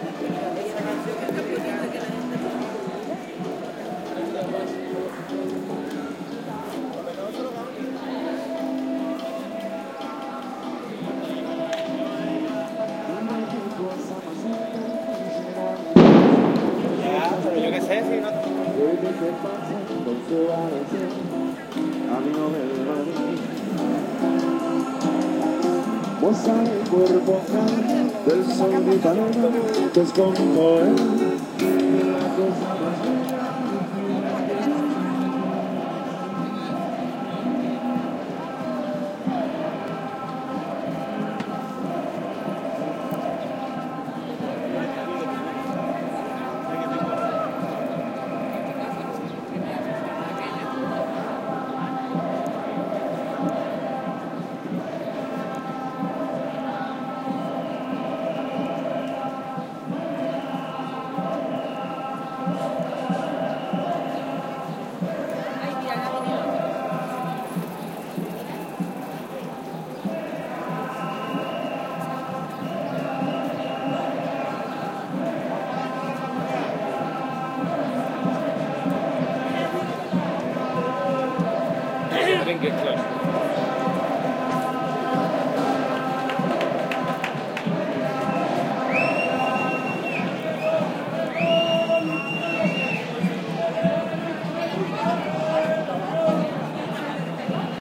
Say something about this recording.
20120329 strike.05.cacophony
street performer music merges with people shouting slogans against the government during a demonstration. Recorded in Seville on March 29th 2012, a day of general strike in Spain. Soundman OKM mic capsules into PCM M10 recorder